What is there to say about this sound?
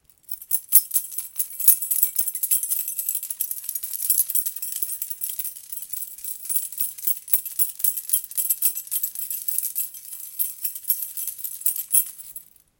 Rattling keys sound (from left to right)
The sound of rattling keys recorded in stereo.
Recorded with Zoom H1 built in microphones.
No eq or any other effect applied.
Enjoy!